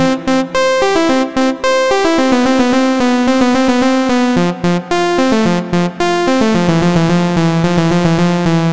Part of the Epsilon loopset, a set of complementary synth loops. It is in the key of C major, following the chord progression Cmaj7 Fmaj7. It is four bars long at 110bpm. It is normalized.
synth, 110bpm